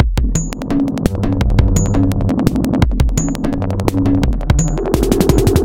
Boolean Long 1
Electronic drum loop